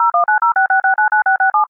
Phone Dial
Tone dialing of a telephone